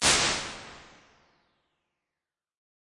Alesis Microverb IR Large 3
Impulse response of a 1986 Alesis Microverb on the Large 3 setting.